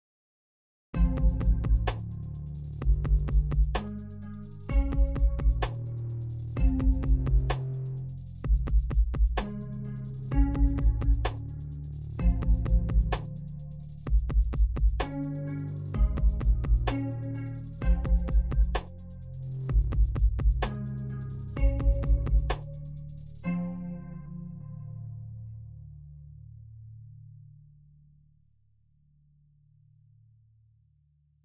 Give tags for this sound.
loop; morning; music

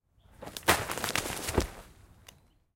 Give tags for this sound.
tackle; floor; fall; forest

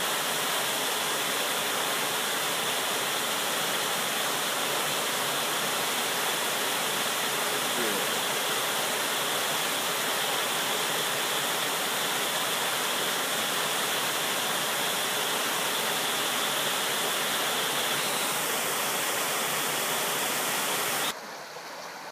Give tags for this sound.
a; little; waterfall

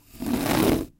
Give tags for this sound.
noise; rough; scrape; scraping; wall; wallpaper

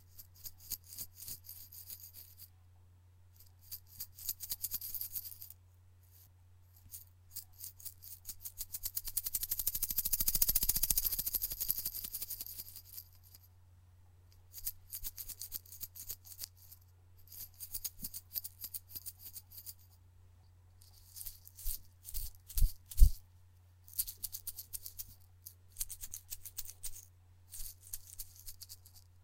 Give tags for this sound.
baby; play; playing; rattle; rattling; shake; shaking; shaking-rattle

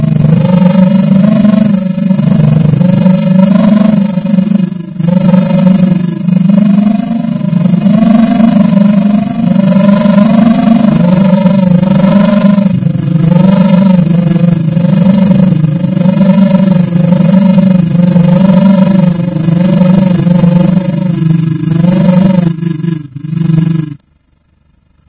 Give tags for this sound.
horror; scary; lars; ghost

